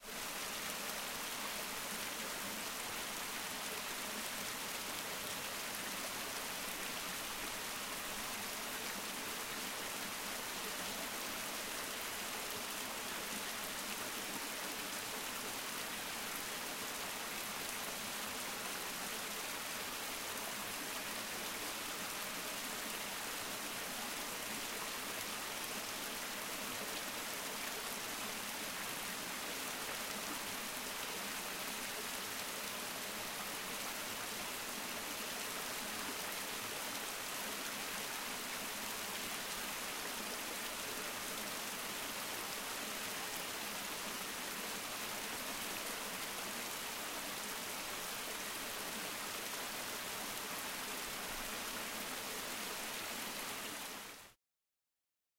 Streamlet (strong)
At the National Park of Germany. Normalized +6db.
water, strong-streamlet, water-flow, streamlet